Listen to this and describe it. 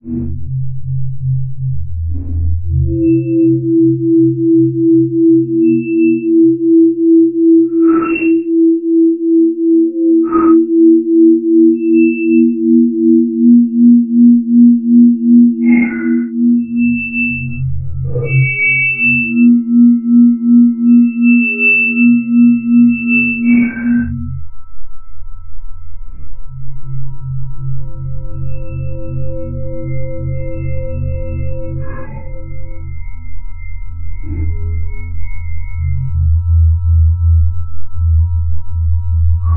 Eerie Synth Soundscape 2
alien, barren, eerie, electronic, experimental, glass, processed, sci-fi, Soundscape, synth, synthetic